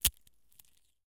shell crunch - wide 04
Crushing an egg shell.
Recorded with a Tascam DR-40 in the A-B mic position.
crunch, crush, wide, crack, egg, egg-shell, stereo, shell, foley, break